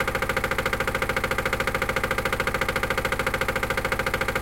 Vehicle Motor Pump Idle Engine Stereo 01
Motor Pump - Idle - Loop.
Gear: Tascam DR-05.
motor, loop, pump, car, idle, industrial, generator, power, machine, engine, mechanical, machinery, Motorpump